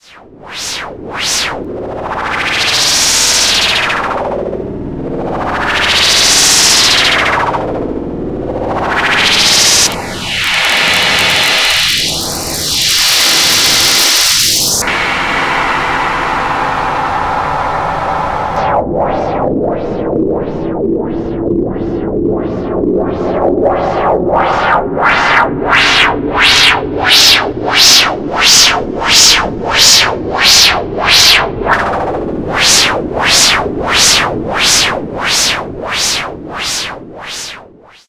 Square sound (400 hertzes) deformed by wahwah, phaser, flat in, flat out, giving a futuristic aspect
future, sound, square